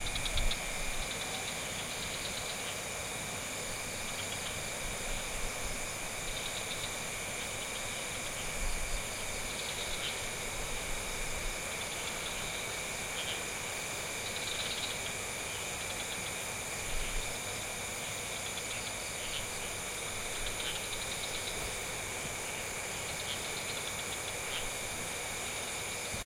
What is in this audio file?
ZOOM0001 LR
Jungle in a daytime with river flowing
water, flow, river, jungle